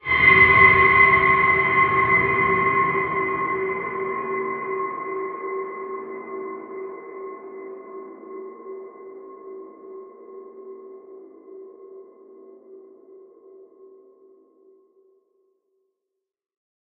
Horror tone
Processed singing bowl recording.
Edited in Cubase 6.5.
creepy, demon, drama, eerie, evil, fear, fearful, Halloween, horror, nightmare, scary, sinister, spooky